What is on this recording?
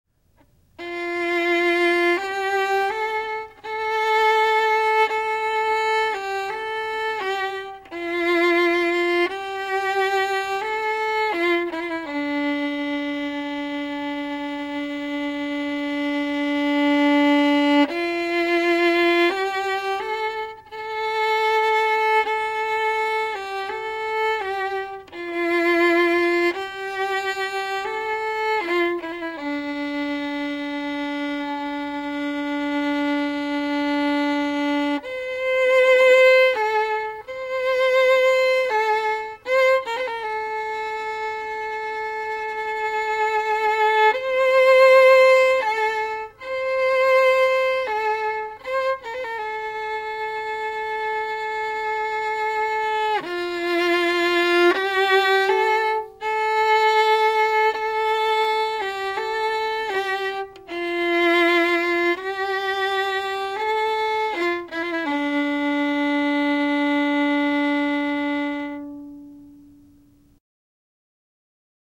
violin Tchaikovsky danse arabe
Violin solo. A short impression of Tchaikovsky's "Danse Arabe". Played by Howard Geisel. Recorded with Sony ECM-99 stereo microphone to SonyMD (MZ-N707).
solo, music, violin, classical, environmental-sounds-research